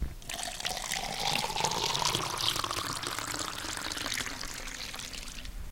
cup o water being filled